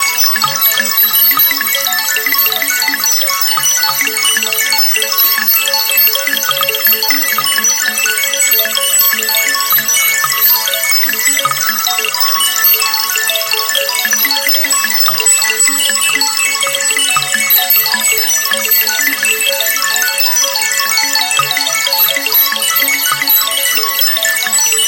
One of the sounds i have designed for Corona Vsti.
Pentatonic scale.